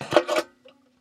Hit Metal Tin 01
my Turkish banjo hitting the table. Ever usefull !
can, fall, hit